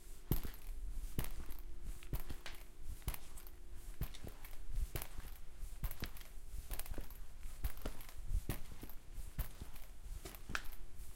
FP Footsteps Boots Concrete Floor
Footsteps in boots recorded on a concrete floor.